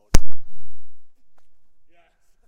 1 quick, low pitch glove catch. soft smack.